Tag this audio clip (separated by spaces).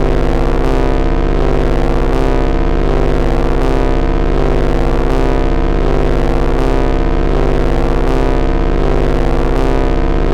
force-field soft